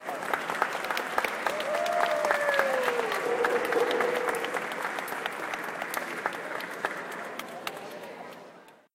Parents clapping and cheering. Some parents can be heard saying "woo-hoo!". This was recorded using an iPhone using Voice Memos at Windward Mall.